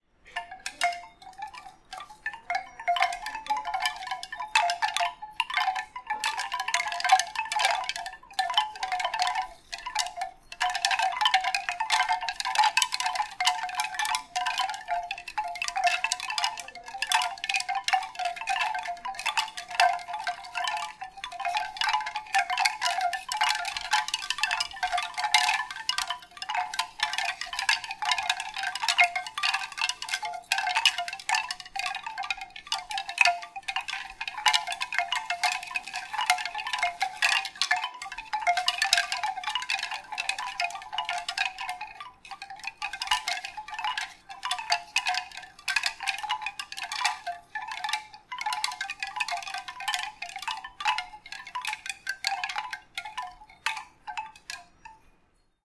Bamboo Chimes, A
Audio of a set of bamboo chimes in a gift shop at the Jacksonville Zoo in Florida. I have removed a large amount of noise. The recorder was about 15cm away from the chimes.
An example of how you might credit is by putting this in the description/credits:
The sound was recorded using a "H1 Zoom recorder" on 22nd August 2017.
bamboo, chime, chimes, wood, wooden